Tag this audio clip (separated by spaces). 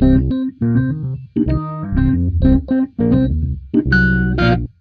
fender funk jazz rhodes